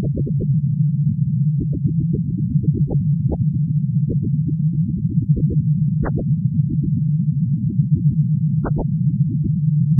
Read the description on the first file on the pack to know the principle of sound generation.
This is the image from this sample:
processed through Nicolas Fournell's free Audiopaint program (used the default settings).